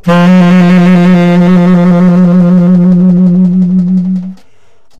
TS semitone trill f2
jazz, sampled-instruments, sax, saxophone, tenor-sax